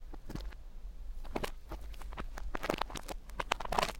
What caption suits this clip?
I took the recording of horse hooves on gravel and reversed to get a different popping texture.